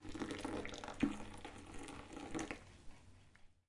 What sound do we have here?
kitchen drum percussion jar tap sound hit kettle water pouring
kettle, percussion, running, sound